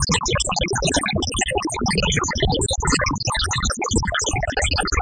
Alien sound
A weird sound i mad ages ago whiles playing around with coagula
alien, Alien-sound, beep, boop, coagula, fast